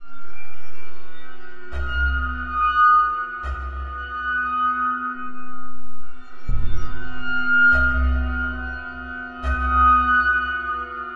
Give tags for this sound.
best-sound; mystic; horror-sound; helloween; trailer; film-music; film-effects

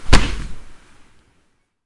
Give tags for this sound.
smash; body; melee; woosh; punch; hand; block; kick; attack

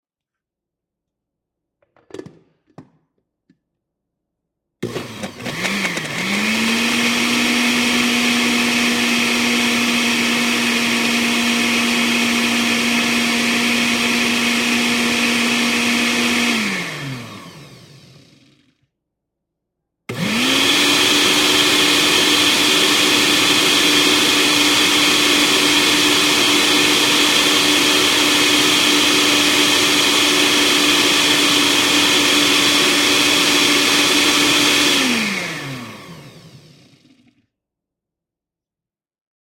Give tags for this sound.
food; cook; drink; licuar; cooking; licuado; cooking-blender; liquate; liquefy; kitchen; blender; shake; cocina; licuadora; smoothie; bebida; mixer

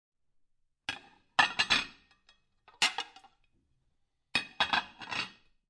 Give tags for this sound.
Panska; housework; ZoomH5; bustle

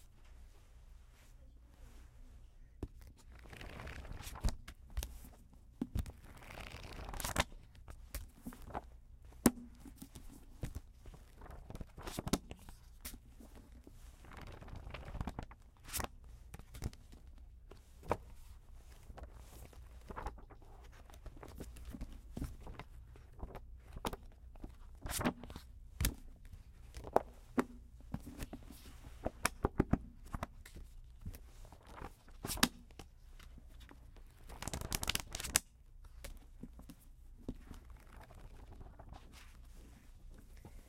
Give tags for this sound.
Estudo; Livro; Livro-de-500-p; UAM; Universidade-Anhembi-Morumbi; book; books; ginas; library; page; pages

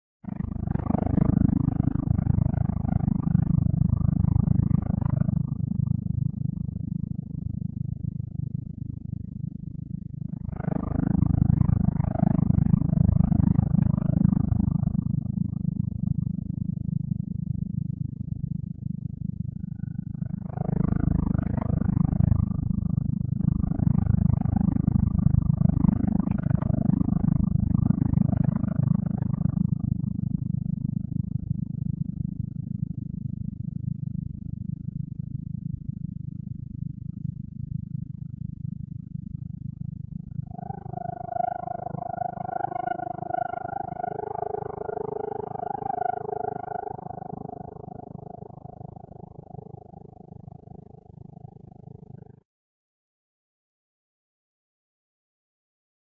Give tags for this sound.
space; alien; monster

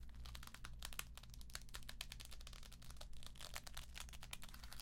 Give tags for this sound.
belt; bend; leather

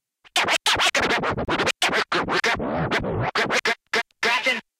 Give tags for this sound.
90; acid-sized; classic; dj; golden-era; hip-hop; hiphop; rap; s; scratch; turntable

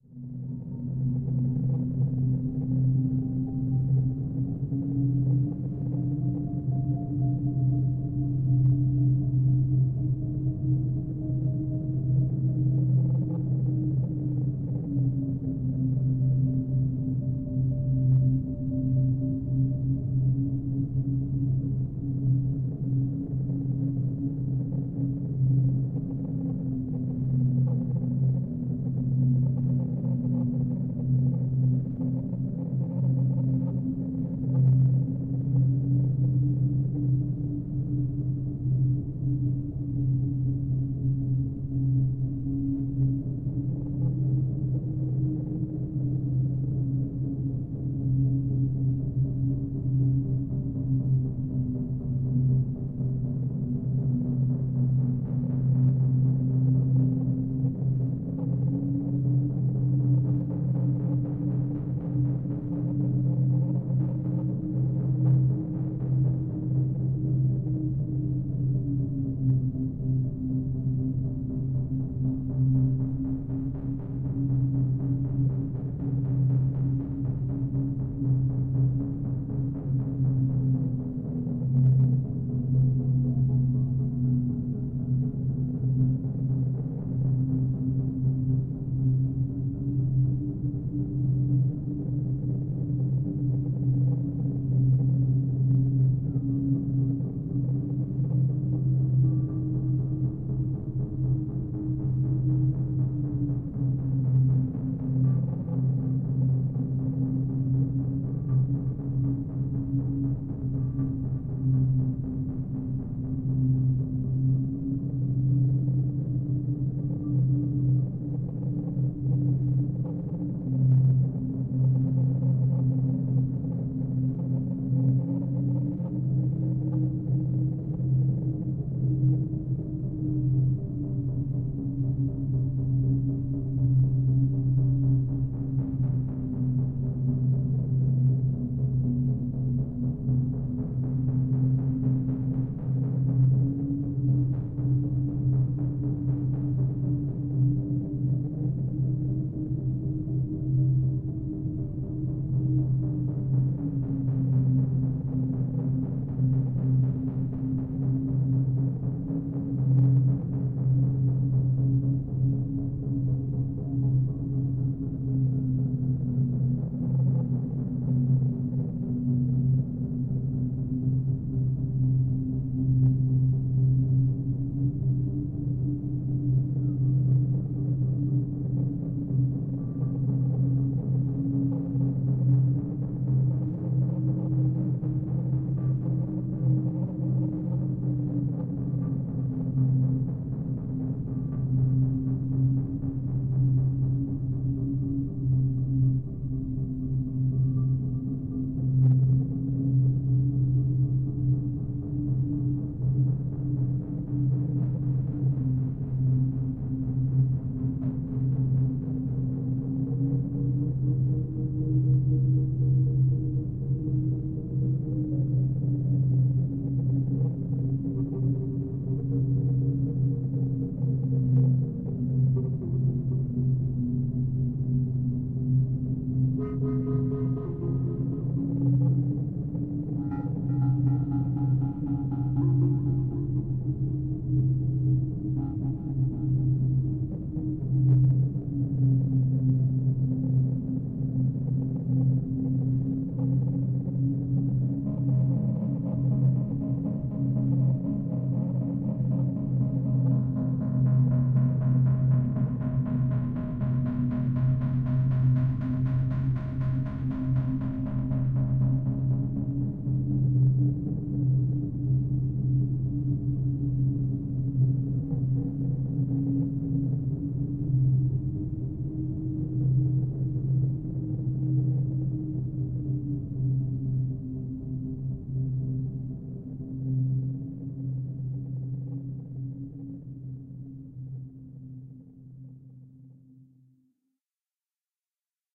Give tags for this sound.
dark; noise; synth

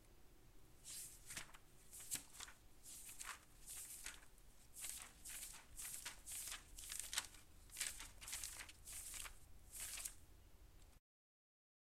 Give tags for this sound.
book; books; flip; flipping; page; pages; paging; paper; turn

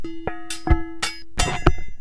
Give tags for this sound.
beat; drum; dry; kitchen; loop; reactable; rhythm; unprocessed

